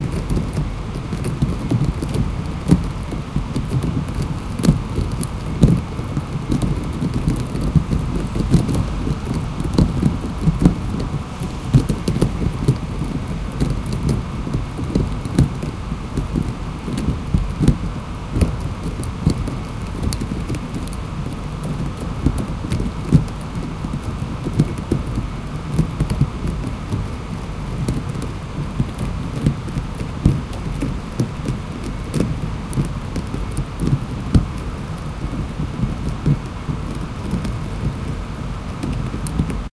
Evaporator tank in a chemical Plant
The liquid product hits against the inner walls of the evaporator tank. There are about 21,000 cubic meters per hour! Recorded with a H4n and wind filter
evaporator, h4n